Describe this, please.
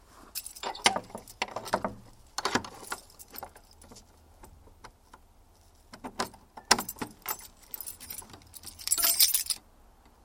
Keys rattling jingling
keychain, opening, unlock, lock, metal, rattle, keys, shut, locking, open, unlocking